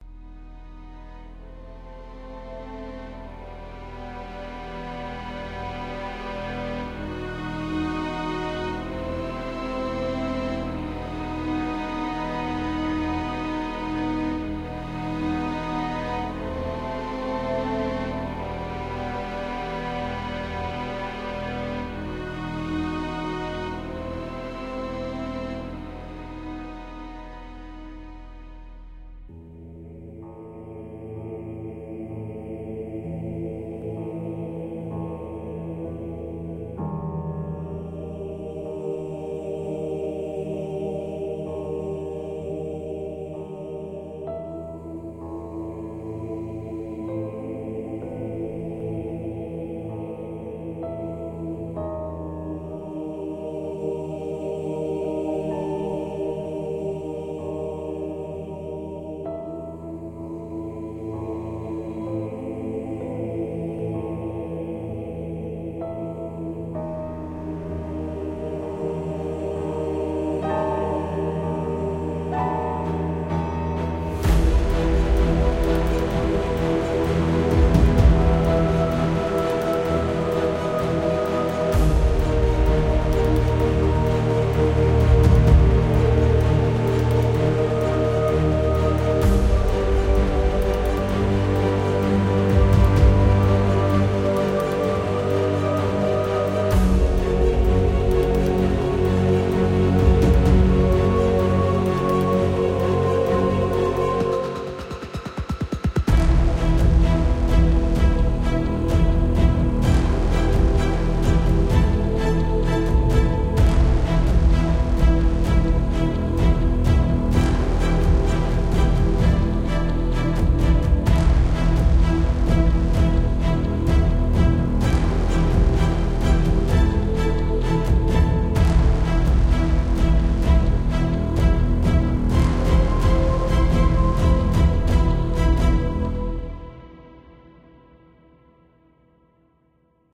Orchestra Music
Track: 59/100
Genre: Epic orchestra
My random left over orchestra